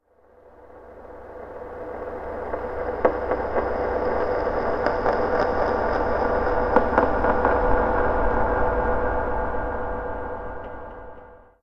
Horror CookieMonster count3
A whole ton of reverb and delay on some found-sound recordings.
dub, fx, horror, reverb, scary